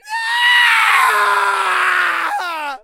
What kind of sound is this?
Shrieking male, expressing extreme pain or sadness.
Recorded with Zoom H4n